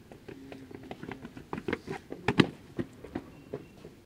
Running Down Stairs Two People
Two people running down stairs, outside.
Stairs, Footsteps, Running